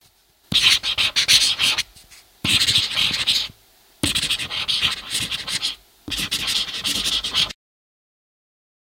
sharpie writing on a paper